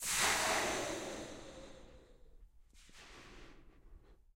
Percussive sounds made with a balloon.
acoustic, rubber, balloon, percussion